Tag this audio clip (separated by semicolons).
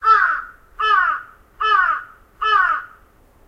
bird; birds; birdsong; crow; crows; field-recording; forest